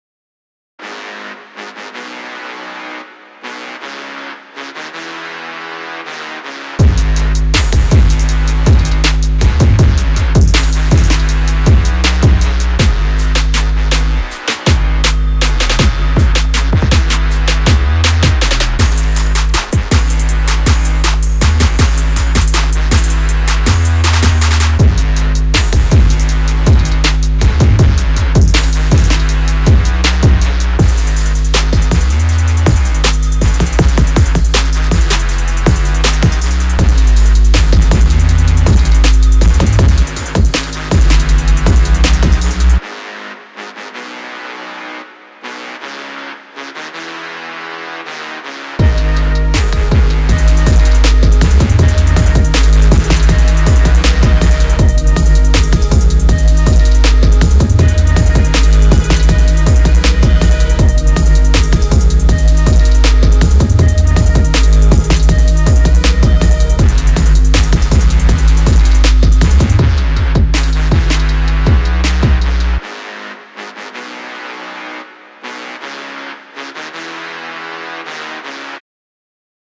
Hip-Hop Sample 3
A cool sounding sample of a hip-hop sound/song. This sound would probably work best in hip-hop songs.
This sound was created with Groovepad.
rhythm, funk, percussion, music, drum-kit, rap, loops, trip-hop, beatbox, hip-hop, loop, dance